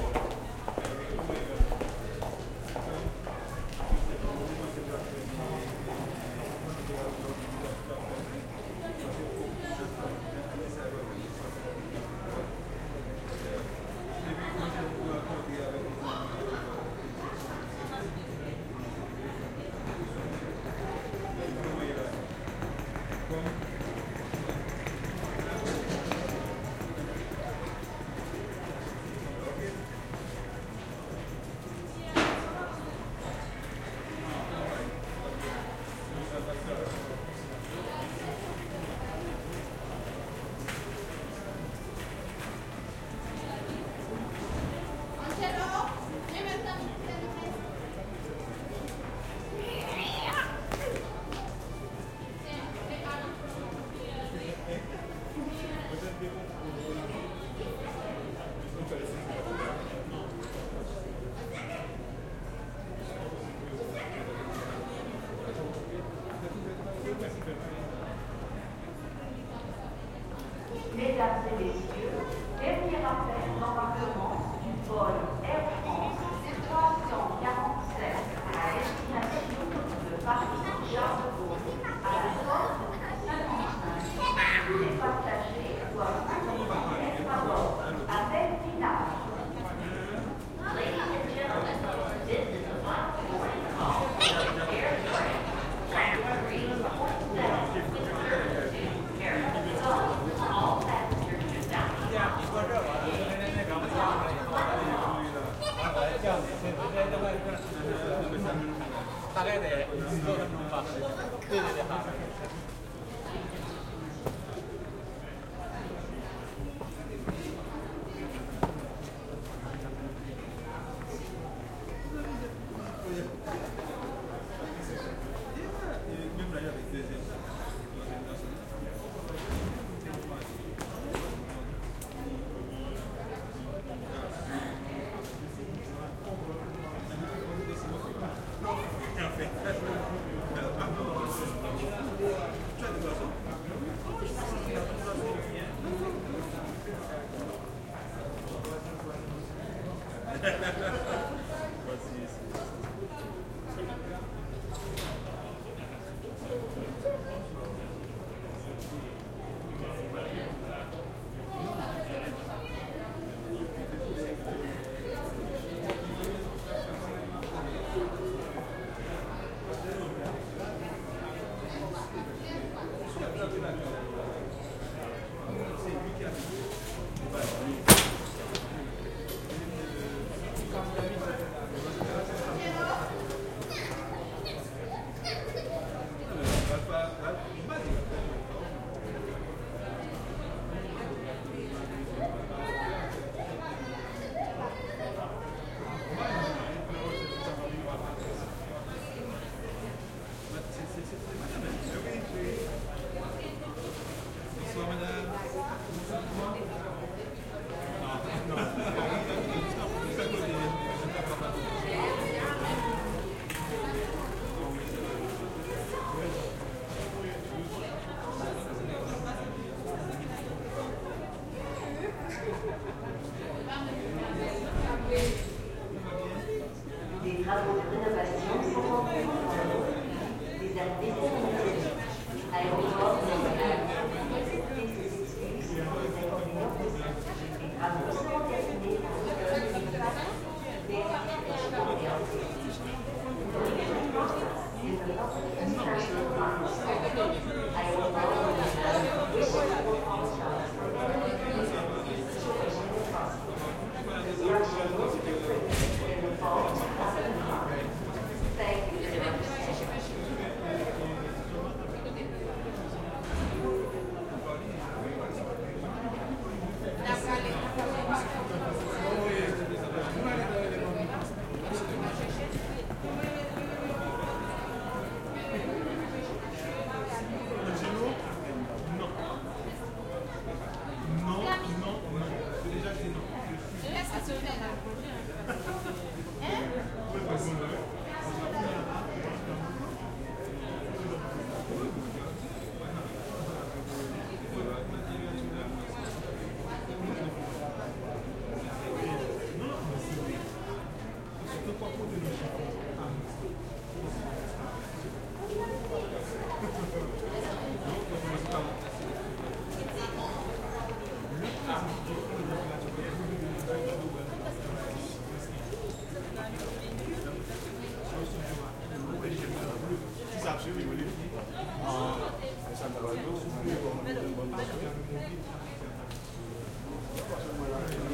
airport terminal gate lounge Dorval Montreal, Canada
Montreal, Dorval, airport, lounge, terminal